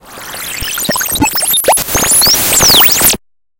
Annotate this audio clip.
Attack Zound-174
Strange electronic interference from outer space. This sound was created using the Waldorf Attack VSTi within Cubase SX.
soundeffect, electronic